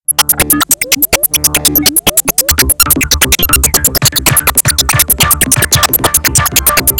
digital noise obtained playing an mpeg video file with an old winamp version (non video capable)